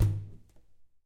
Percussive sounds made with a balloon.
acoustic, balloon, percussion, rubber